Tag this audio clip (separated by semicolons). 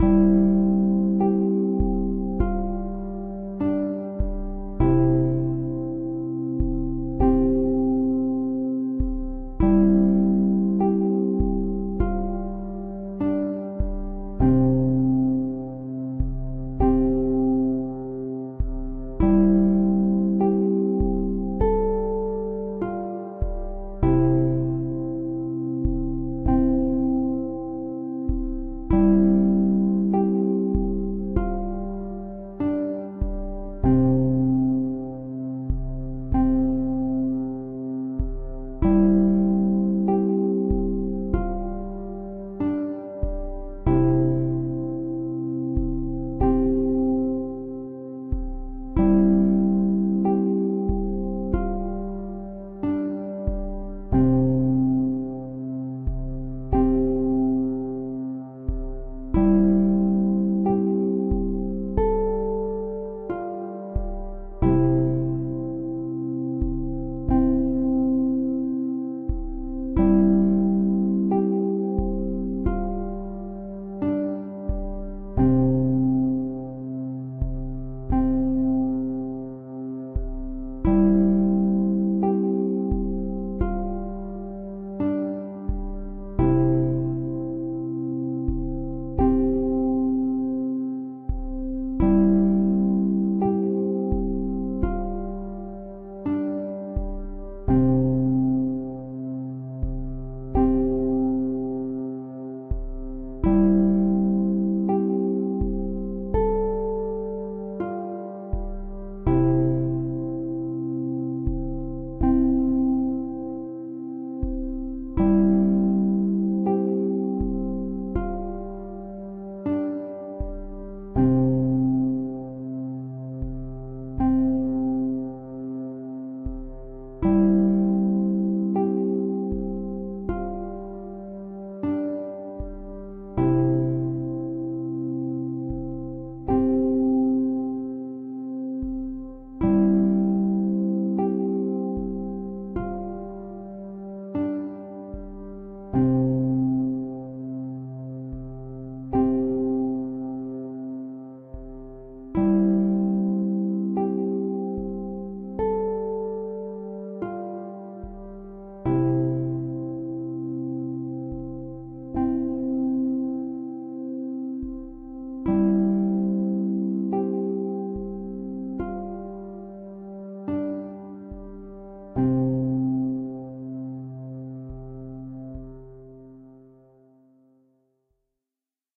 music; lullaby; sleep; mio; evening; relax; wurly; bed; falling-asleep; slow; child; dream; baby